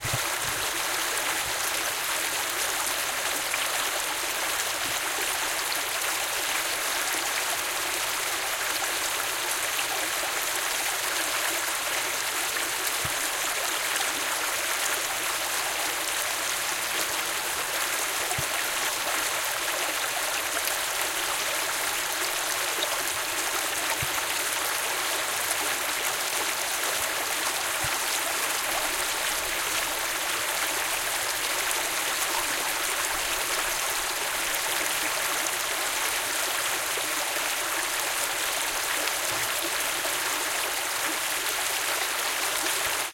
EXT small stream close MS
Back MS pair of quad recording from Zoom H2. Close up POV small stream. Spring run off. Facing down towards water.
stream, close, water